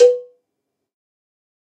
MEDIUM COWBELL OF GOD 040
cowbell, drum, god, kit, more, pack, real